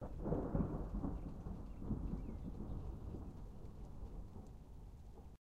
Thunder Medium (chill)
Some rather mild thunder of a shorter duration.
Two Rode NT-1A's pointed out a large window on the second story of a building.
lightning, storm, thunder, thunder-clap, thunderstorm, weather